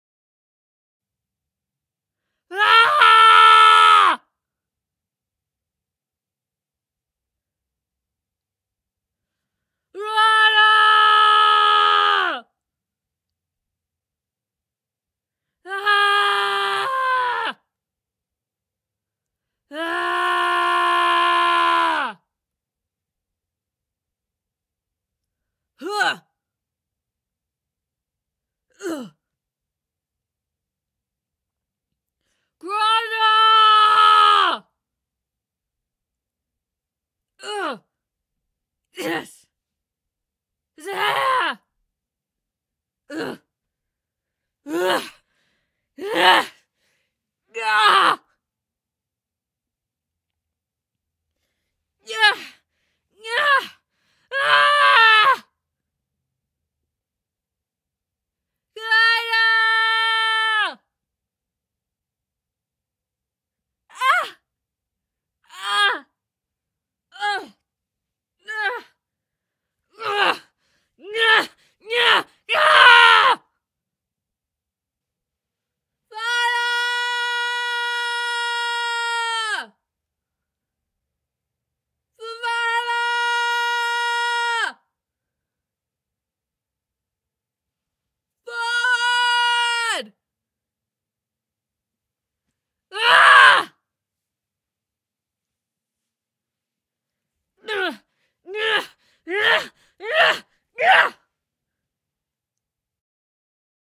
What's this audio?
Screams of a female warrior on the battle field. Encouraging her companions forward, taking blows, giving blows and hacking something to pieces. #adpp
Recorded using a RODE NT-1 Microphone through a UK=r22-MKII interface using REAPER.
I recorded this within a blanket fort to reduce external noise and potential echo.